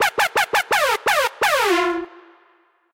Dub Step/ Trap Siren loop
This is a dub step "Skrillex" type loop.
Dub, em, high, HipHop, instruments, kill, loop, loud, native, new, no, peaking, pitched, siren, Skrillex, song, sound, step, track, Trap, type